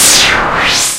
noise effect 3
strange, future, effect